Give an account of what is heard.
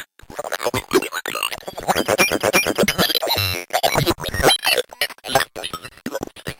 rythmc pinball
A LITTLE SPASTIC, THIS ONE. one of a series of samples of a circuit bent Speak N Spell.
lo-fi, bent, glitch, circuit, speak, spell, lofi, circuitbent